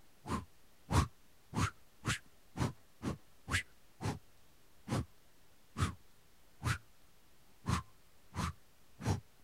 Whooshes (mouth) (fast)
Whooshes made with my mouth...but you can't tell on some of them. Work well when layered with other sfx.
swish, swoop, whoosh